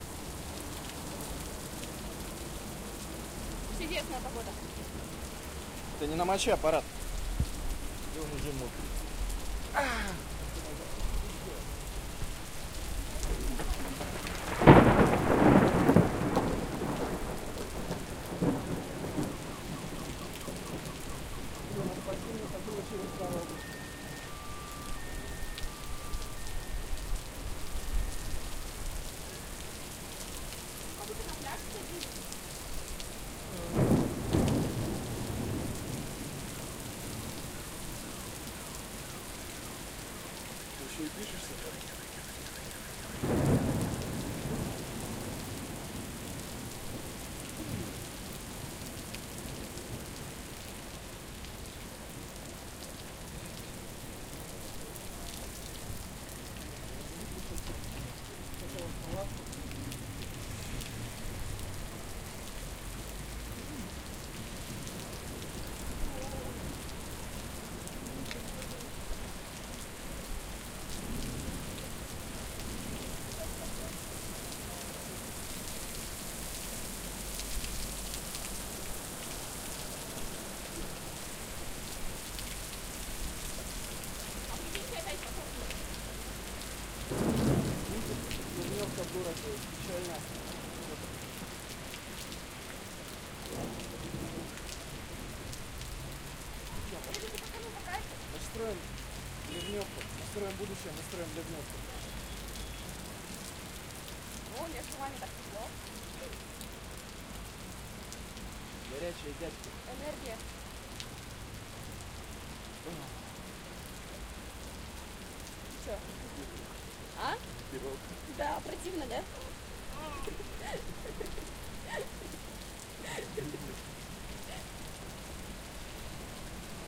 Thunderstorm in the city. Russian peoples are speaks and laughs. Sound of cloudburst. City noise. Cars drive over wet road.
Recorded: 2013-07-25.
XY-stereo.
Recorder: Tascam DR-40, deadcat.